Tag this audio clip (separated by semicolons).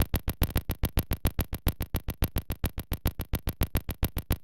click; clipping; glitch